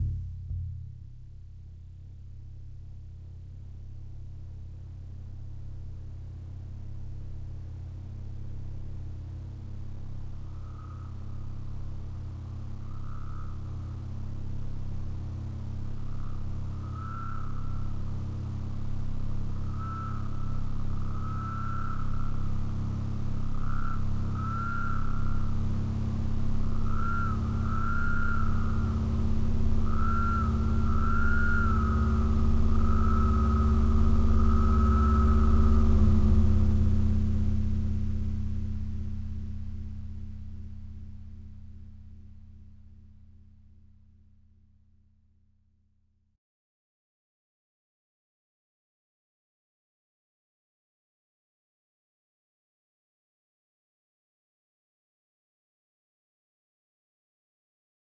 long sweep up fx 1
long sweep up fx usefull for film music or sound design. Made with the synth Massive, processed in ableton live.
Enjoy my little fellows